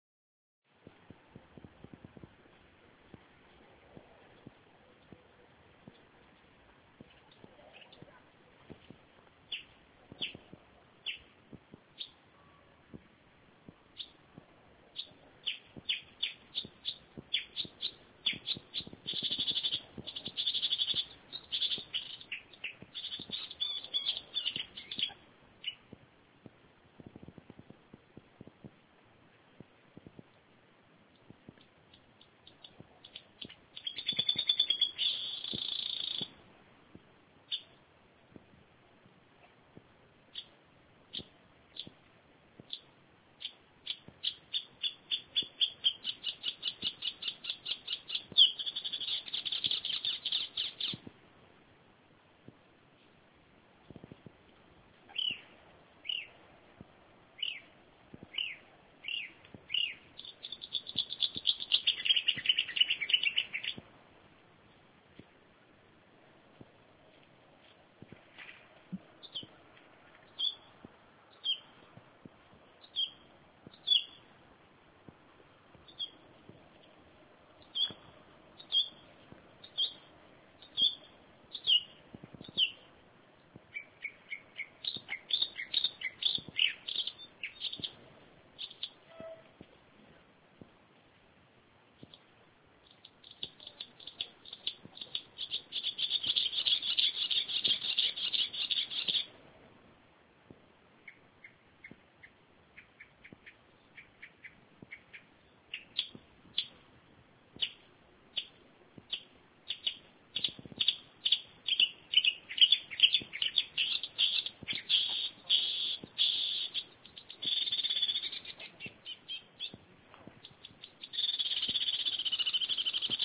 I don't now what the bird is. But it's beautiful. Take by my phone in summer night.